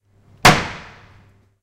Closing the door of a box office
This sound was recorded at the Campus of Poblenou of the Pompeu Fabra University, in the area of Tallers in the corridor E near the WC .It was recorded between 14:00-14:20 with a Zoom H2 recorder. The sound consist in a high frequency and percussive sound with a high attack produced by banging a box office’s door.